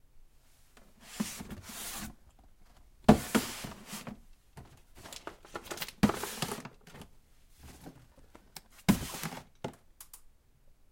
11 Manipulation with the Hardcover files
Putting hardcover into the book shelf.
Panska, Czech, Hardcover, Office, CZ, files